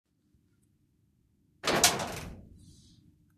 metal elevator door close
Metal elevator door closing.
elevator, close, lift, door, metal